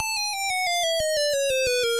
Arcade Sound FX.